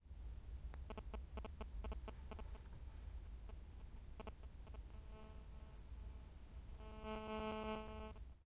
FXSaSc Phone Interference
digital, glitch, handy, noise